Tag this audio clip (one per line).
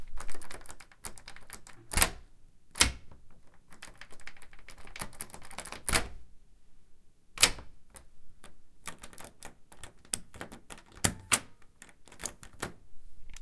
Deadbolt
Doorknob
Jiggle
Lock
Unlock